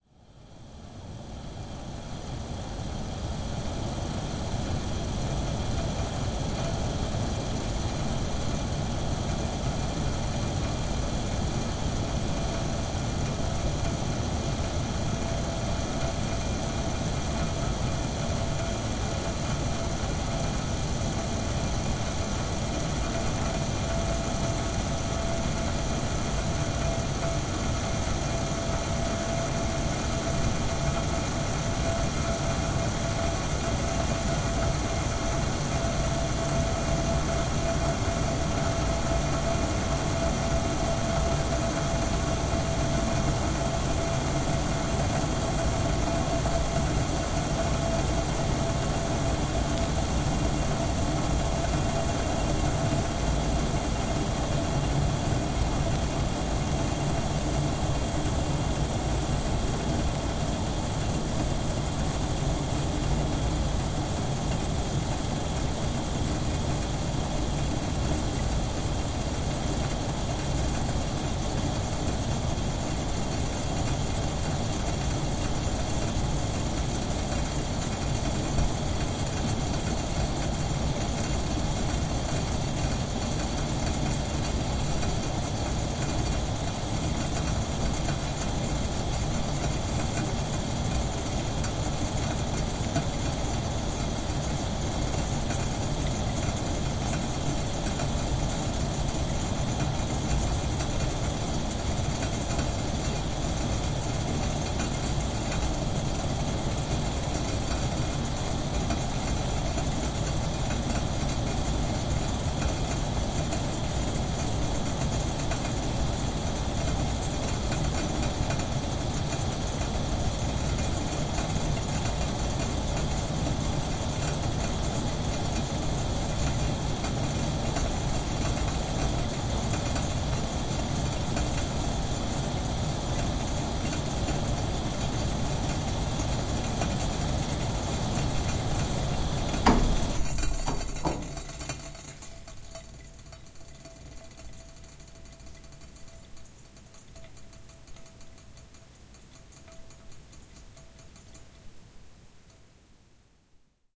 A kettle coming to a boil
boiling-water, kettle, gas-oven, steam